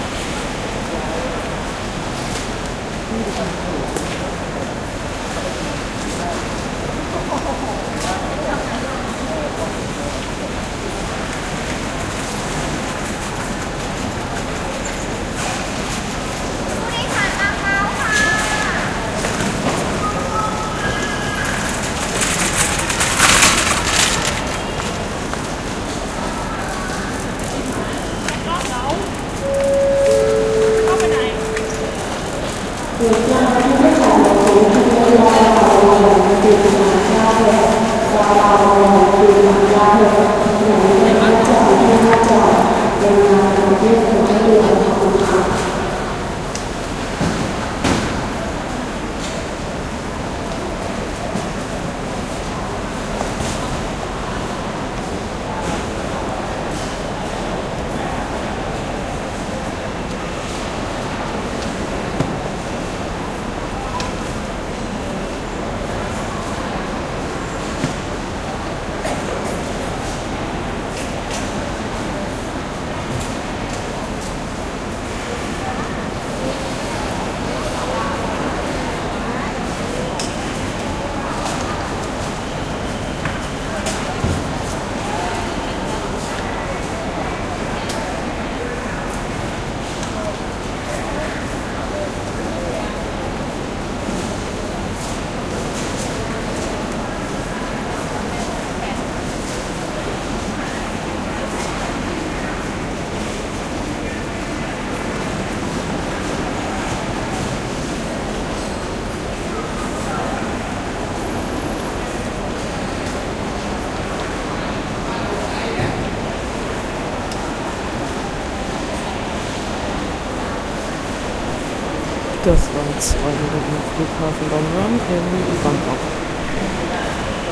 BKK airport with announcement
Bangkok airport departure lounge with announcement in Thai.